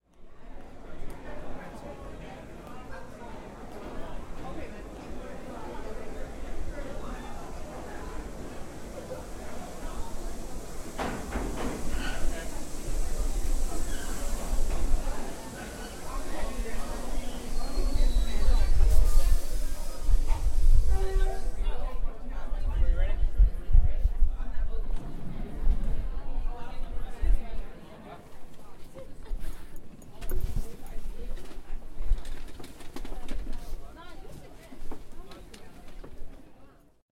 NYC subway station, people talking, train arriving, boarding train